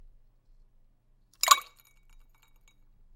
Dropping an ice cube into a cocktail glass with liquid in it. Schoeps CMC641 microphone, Sound Devices 442 mixer, Edirol R4-Pro recorder.